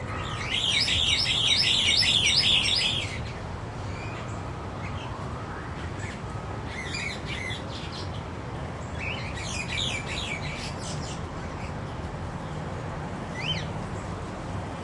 Calls from a small group of Scissor-billed Starlings. Recorded with a Zoom H2.